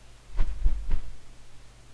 Some fight sounds I made...
combat, fight, fighting, fist, hit, kick, leg, punch